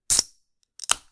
Opening Soda Can
Opening a can of seltzer water.